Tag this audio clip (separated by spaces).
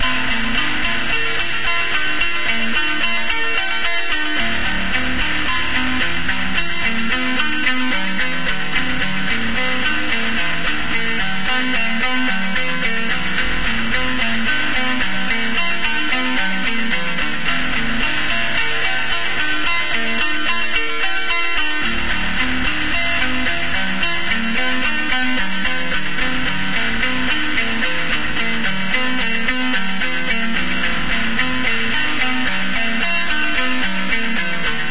loop delay